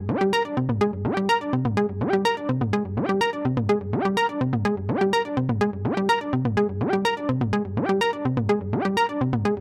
stsh 125 Gm anlg lead arp 4qiD2 02 short
Analog monosynth recorded in my studio. Applied some light reverb, chorus, and sidechain compression.
sequence, melodic, arp, analog, loop, techno, synth